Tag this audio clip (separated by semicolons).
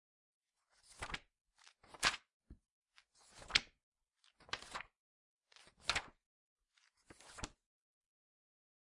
notebook; classroom